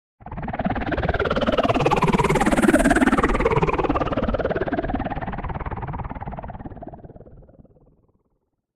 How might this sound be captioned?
CP Moon Buggy
I was just thinking of a hover-scooter, or something like that. Could possibly be used for a number of passing sci-fi vehicles.